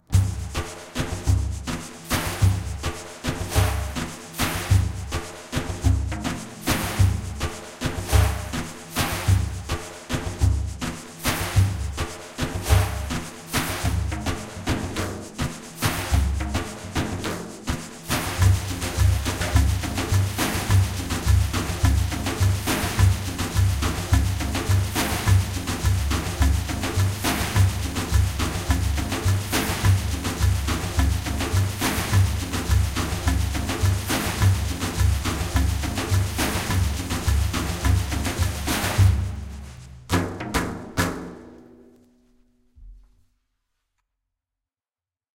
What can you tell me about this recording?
A short take of live percussions which i recorded in Logic pro for my own music production,I just thought i share it with you people. I,ll be sending more if i get feedback from you guys out there. Oh, the tempo is 105bpm
rhythm, BassDarbuka, etc, Deffs, Bendir, percussion, shaker